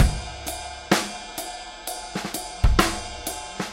trip hop-01
trip hop acoustic drum loop